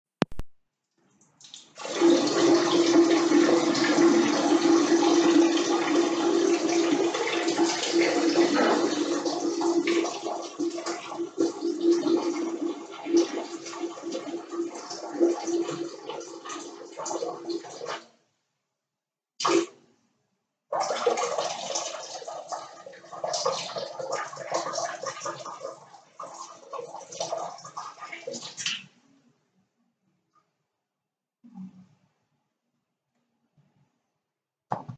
Pee - Pinkeln
Pee on the Toilette
lassen, Pee, Pipi, Wasser, Pinkeln, Toilette